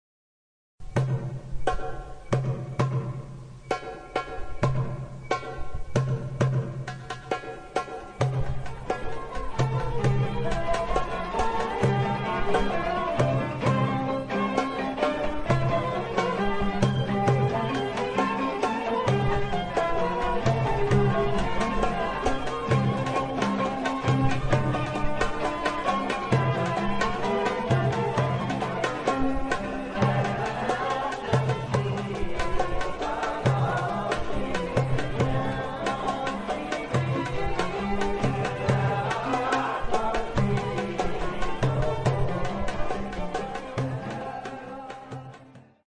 Qaim Wa Nisf Msarref Rhythm+San'a
Qáim Wa Nisf msarref (light) rhythm with ornaments, applied to the San'a "Nedemmem Wa Nejda'" of the rhythm Qáim Wa Nisf of the nawba Istihlal